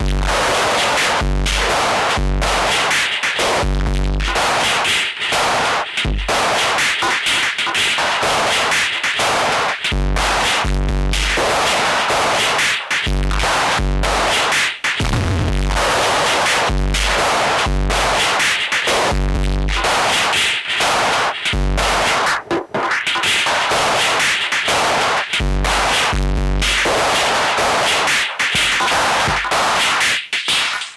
Drum Loop
124 BPM
Key of F Minor